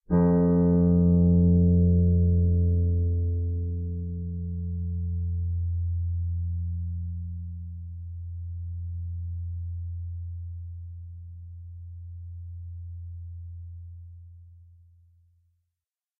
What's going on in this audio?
Low F-note recorded on a Fender Stratocaster.
F-note, electric-guitar, low-f, fender-stratocaster, clean